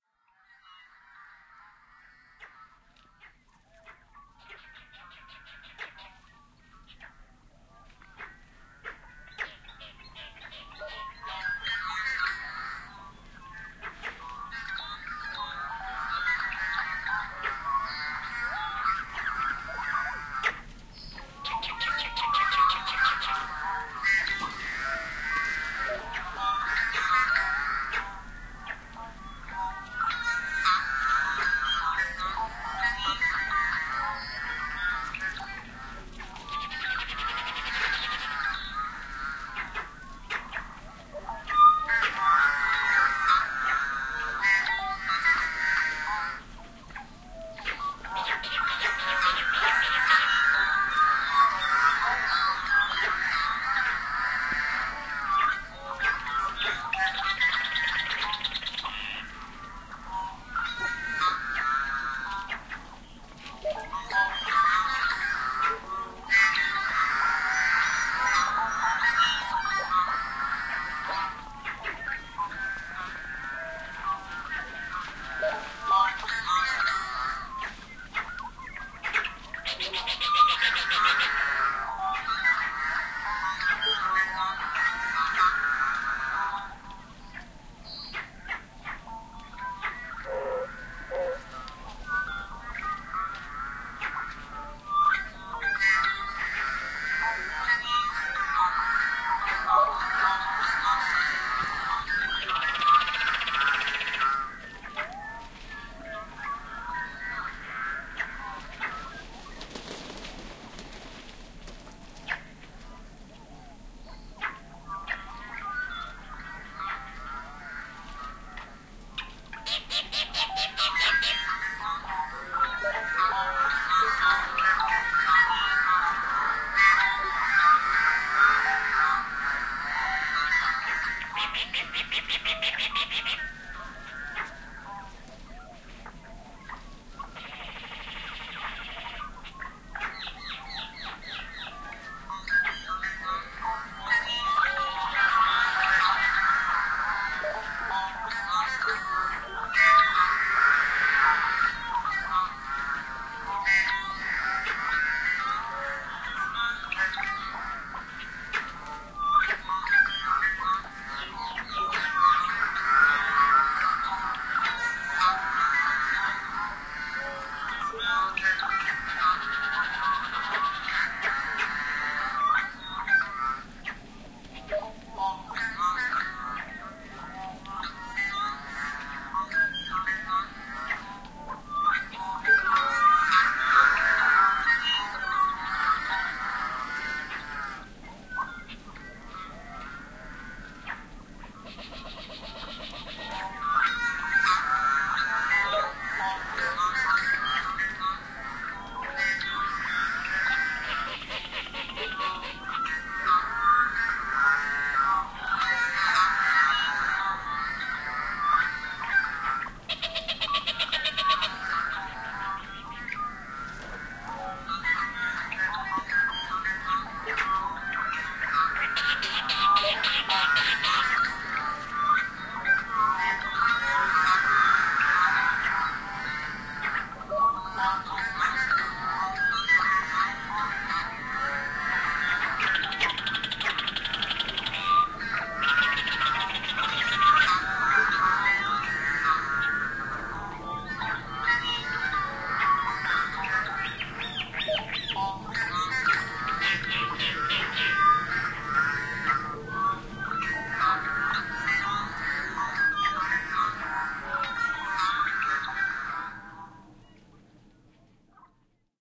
TB2 track 03a
This recording was done March 10th, 2009, on Sherman Island, California. Playback is at half-speed.
blackbirds, california, sherman-island